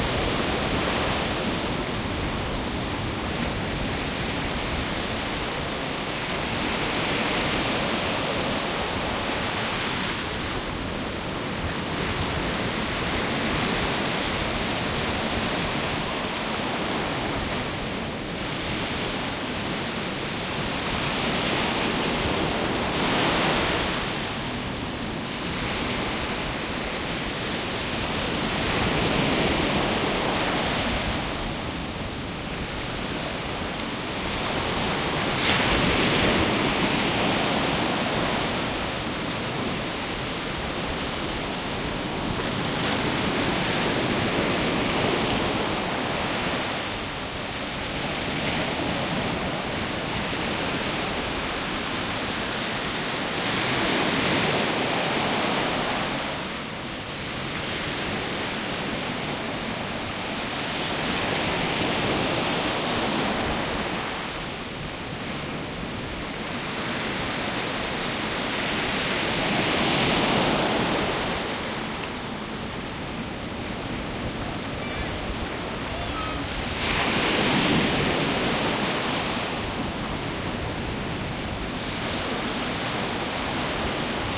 waves beach med4
waves beach medium intensity
beach, medium, waves